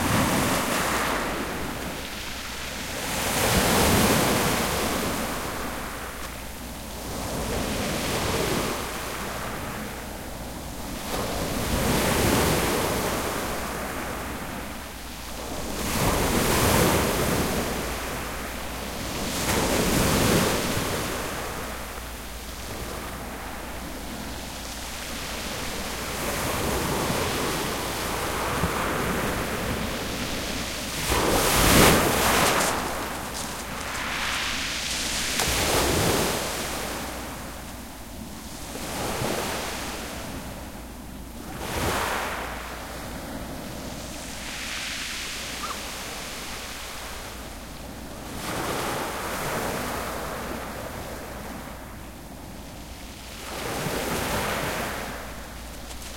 Waves on shale beech with distant low boat engine in background. 01
Small Waves crashing on a on shale beech with distant low boat engine in the background. Recorded using a TASCAM DR-05 with wind muffler.
beach, breaking-waves, lapping, sea, sea-shore, seashore, Waves-on-shale-beech